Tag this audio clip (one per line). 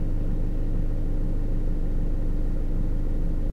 van
motor
bus
running
engine
car